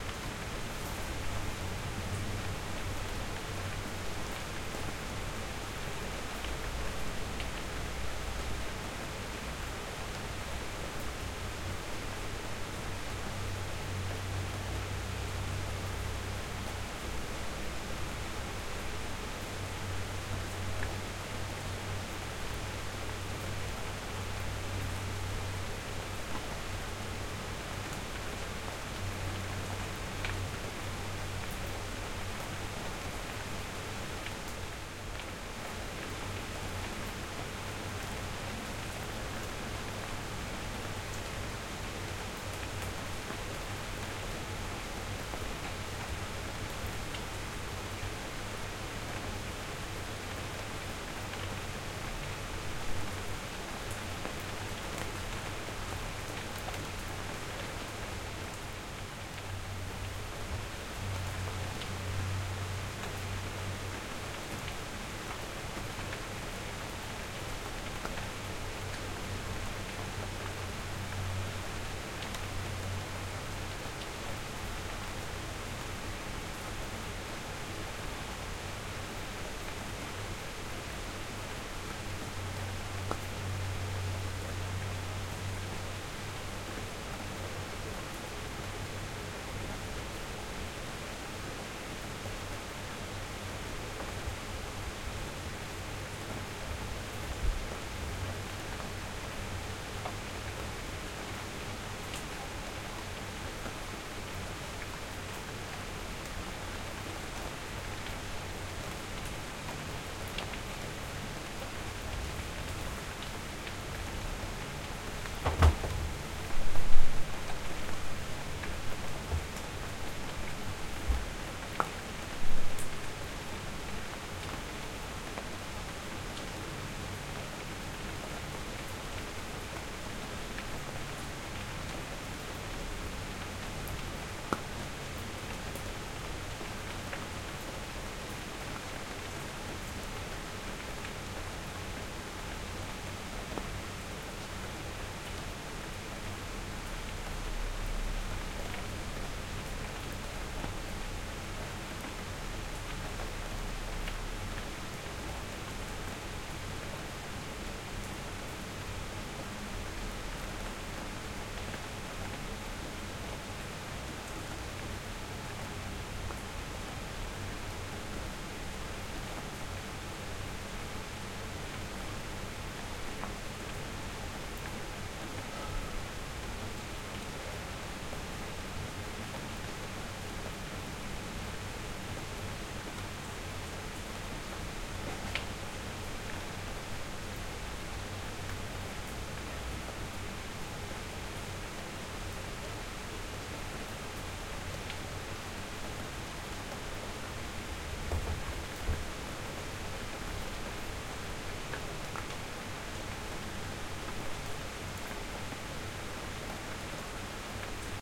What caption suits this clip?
Rain from Half Open Window 2
XY-stereo recording made with RØDE NT4 microphone and MOTU Ultralite MK3. /// Rain falling against my room's walls and windows, and some more direct raindrops falling into the open window. /// Recorded in Amsterdam West.